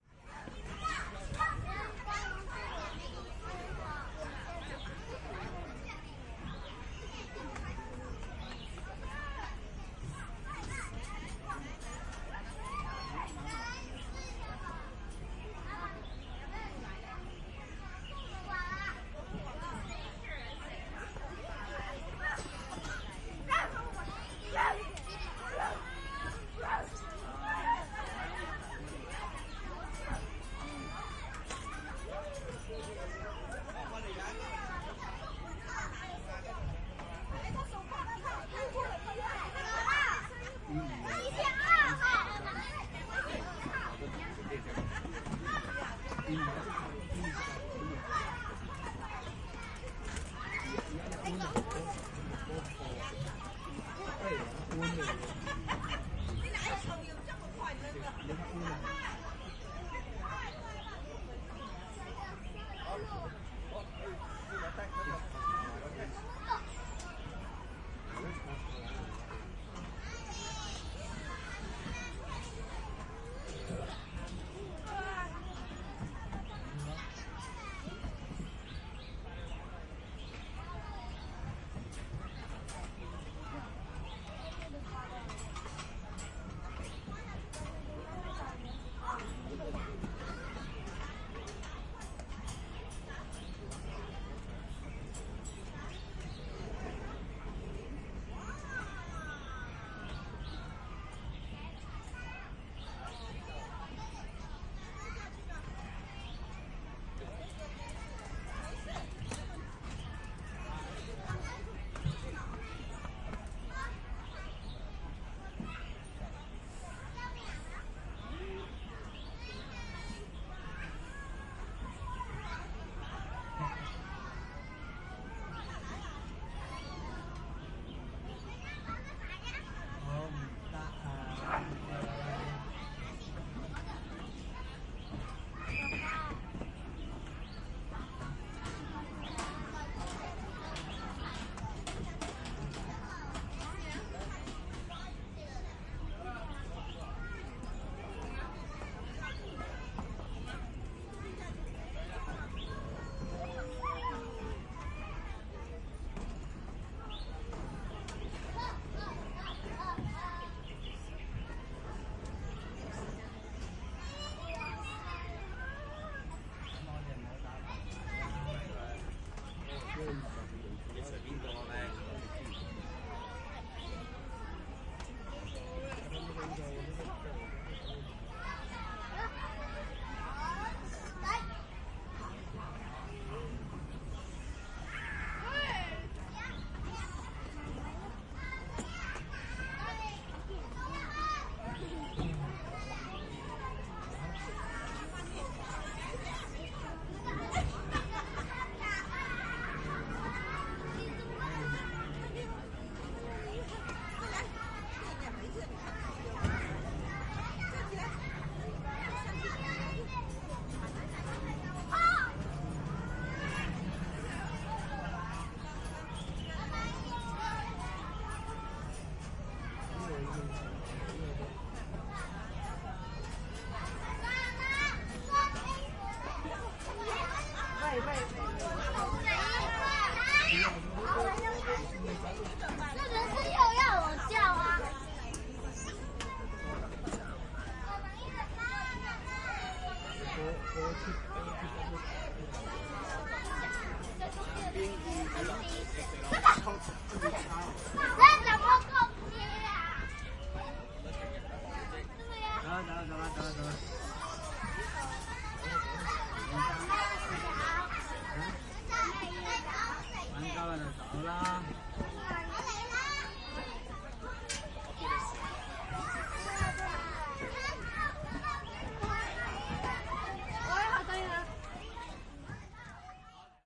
LS 34242 HK KowloonPark
Kids playing in Kowloon Park, Hong Kong. (binaural, please use headset for 3D effect)
I made this binaural audio recording while sitting on a bench near a playground in Kowloon Park, located in Hong Kong.
Here, you can hear children playing and shouting, some adults talking, and in the background, birds in the trees and some distant sounds coming from the city and the streets around this big park.
Recorded in February 2019 with an Olympus LS-3 and Ohrwurm 3D binaural microphones.
Fade in/out and high pass filter at 60Hz -6dB/oct applied in Audacity.
binaural, Chinese, playground